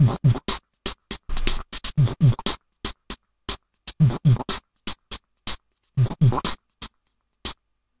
Beatbox 01 Loop 07a Complex@120bpm
Beatboxing recorded with a cheap webmic in Ableton Live and edited with Audacity.
The webmic was so noisy and was picking up he sounds from the laptop fan that I decided to use a noise gate.
A fairly complex beatbox rhythm.
I personally prefer the shorter version.
You will notice that there is a point where the noise gate opened ahead of the beat and some noise passes through. I think that actually adds character to the beat. Of course, you can remove that out with a sound editor, if you don't like it.